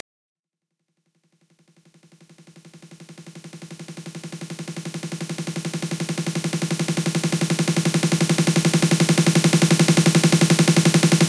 Snare Roll 16th
Dance, Hardcore, Snare, Snare-Roll